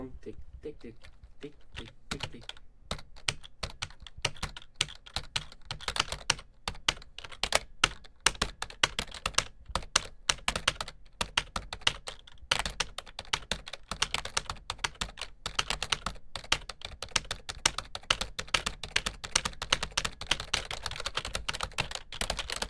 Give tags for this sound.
tap; type; rhythmic; keyboard

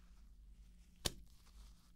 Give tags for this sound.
stretch; sound; rope

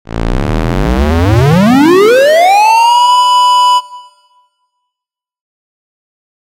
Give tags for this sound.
theremin; 8-bit; digital; alarm

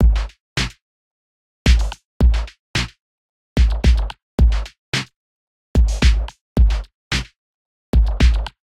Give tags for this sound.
sounds; weird

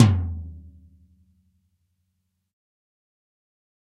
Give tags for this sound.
drum; heavy; drumset; 14x10; 14; tom; realistic; punk; raw; real; metal; pack

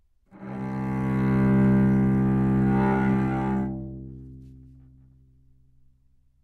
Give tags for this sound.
C2; cello; good-sounds; multisample; neumann-U87; single-note

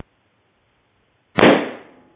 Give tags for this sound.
balloon; androidrecording

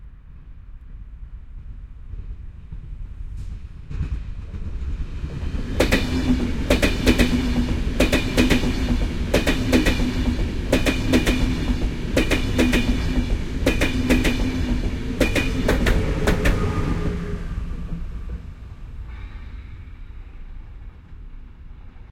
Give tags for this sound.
clatter; electric-train; pass; rail; rail-road; rail-way; railway; train; transport; wheels

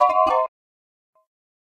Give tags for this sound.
sound-design; gameaudio; indiegame; soundeffects; sfx; effect

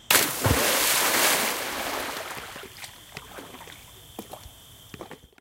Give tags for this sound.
body; falling; hitting; splash; theatre; water

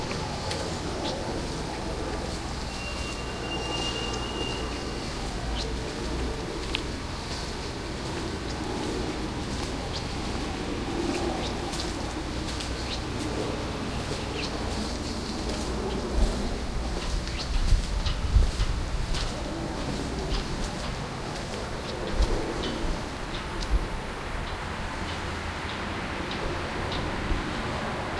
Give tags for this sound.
field-recording; outside; stereo; waking

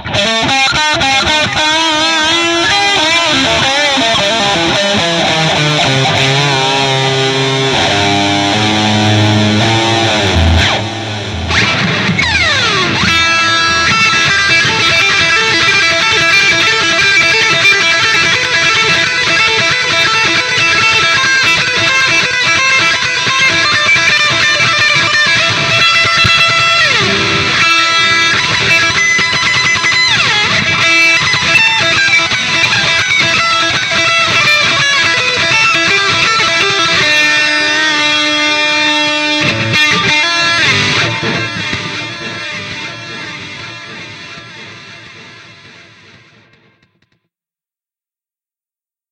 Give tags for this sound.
Ambient; Audio; Effect; Guitar; Music; Sound

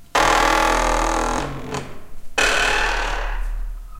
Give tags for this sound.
discordant; squeak

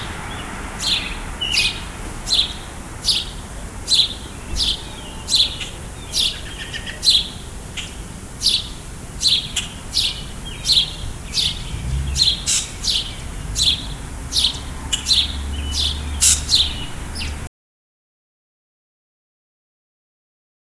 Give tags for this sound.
birds; ambience; nature

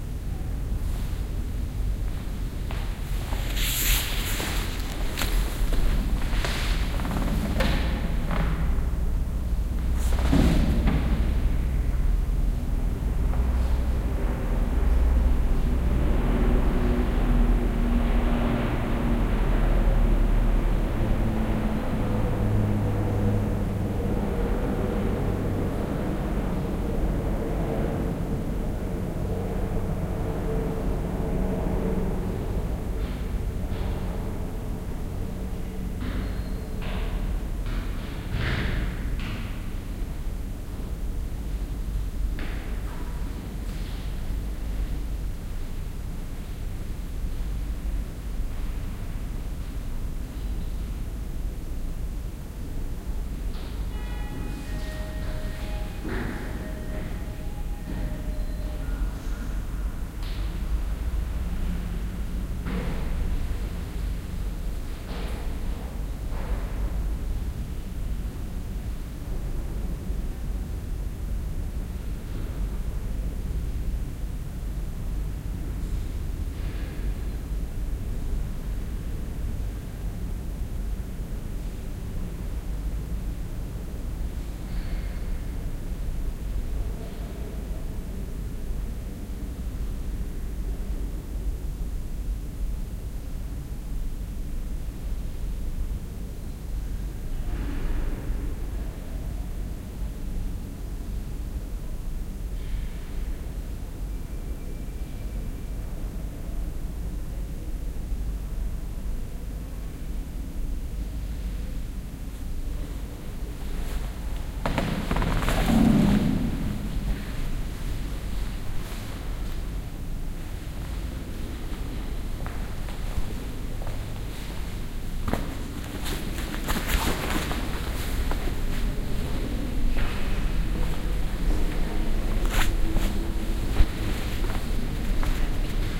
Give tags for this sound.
ambiance; ambience; ambient; atmosphere; background-sound; city; field-recording; general-noise; london; soundscape

